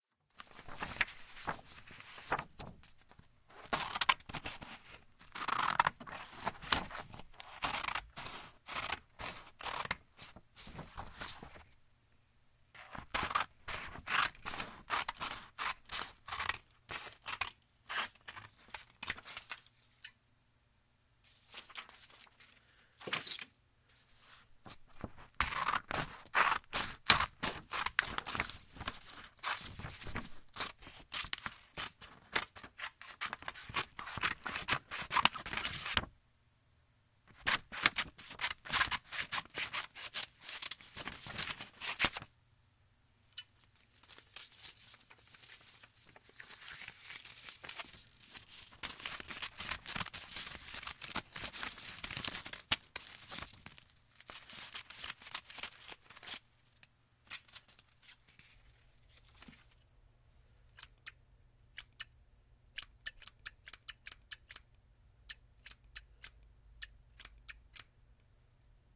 Recording of sheets of paper being cut.